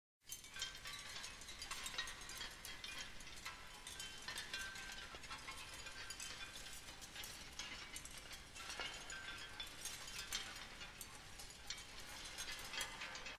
Wind Chime 1
chime, wind